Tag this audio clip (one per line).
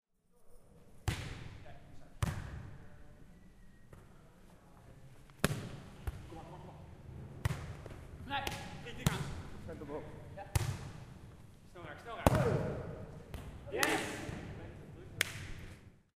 beachvolleyball
beachball
inside
sport
ambiance
beach
ball
hitting
indoor
hall
volley